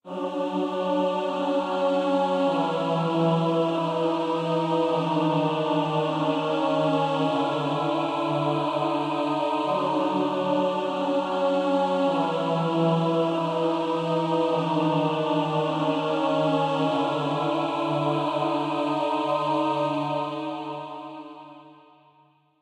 ch2 100bpm
These sounds are made with vst instruments by Hörspiel-Werkstatt Bad Hersfeld
ambience, ambient, atmosphere, background, background-sound, choir, chor, cinematic, dark, deep, drama, dramatic, drone, epic, film, hollywood, horror, mood, movie, music, pad, scary, sci-fi, soundscape, space, spooky, suspense, thrill, thriller, trailer